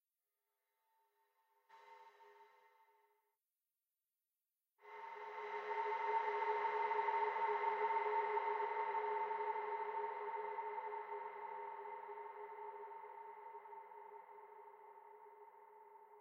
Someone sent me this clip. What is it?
Another outer space metallic sound, this time coming from Saturn. This
is a sample from the "Surrounded by drones pack 1" sample pack. It is a
It was created within Cubase SX.
I took a short sample from a soundscape created with Metaphysical
Function, an ensemble from the Electronic Instruments Vol. 2 from
Native Instruments, and drove it through several reverb processors (two SIR's using impulses from Spirit Canyon Audio and a Classic Reverb
from my TC Electronic Powercore Firewire). The result of this was
panned in surround in a way that the sound start at the center speaker.
From there the sound evolves to the back (surround) speakers. And
finally the tail moves slowly to the left and right front speakers.
There is no sound for the subwoofer. To complete the process the
samples was faded at the end and dithered down to 16 bit.